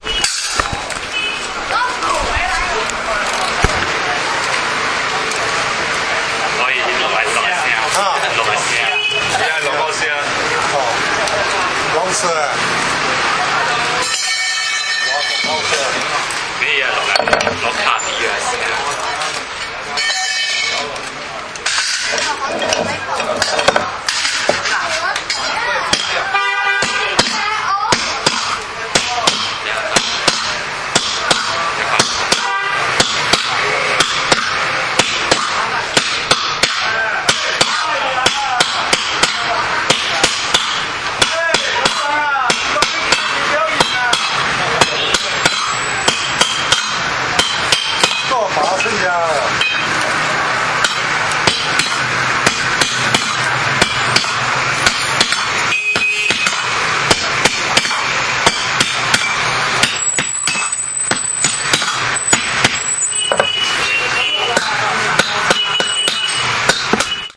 wyroby metalowe
forging metalware with means of hammer in the sidestreet of southern chinese chaozhou
chaozhou, clatter, field-recodring, hammer, metalworking